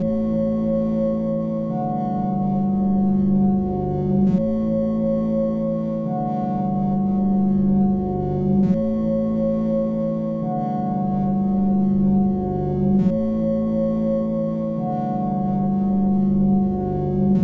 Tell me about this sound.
Some notes played on a Nord Modular, then processed with a home-made looper/granulator plug-in. Sort of reminds me of Aphex Twin.
ambient, glitch, granular, loop, nord, pad, quiet, soothing, sound-design